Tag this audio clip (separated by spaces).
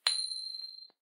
dime flic money